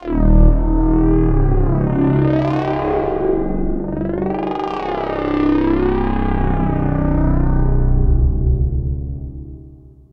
blofeld init patch atmosphere
blofeld, atmo, waldorf